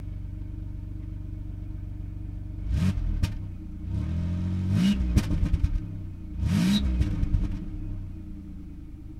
this is a recording of a 2000 Buick Lesabre revving at the muffler.